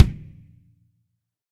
MMP KICK 001
Several real kick hits layered with drum machine samples and processed.